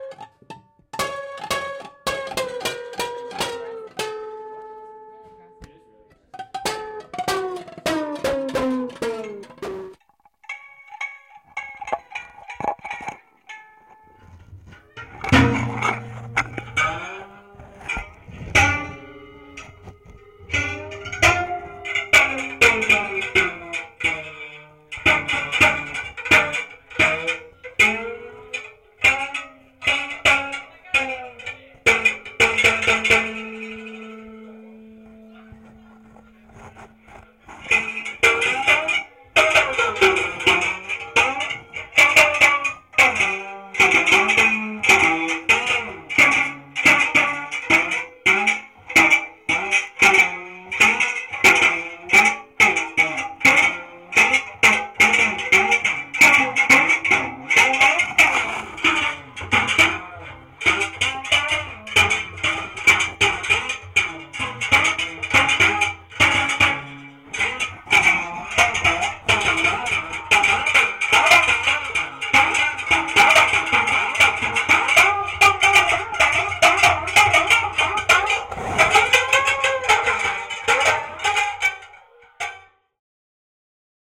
Constant Strum of Intonarumori
s, synthetic, horrible, sound